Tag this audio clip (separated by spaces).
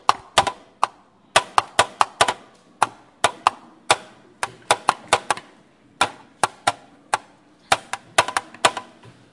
Diana Joao-Paulo-II pen Portugal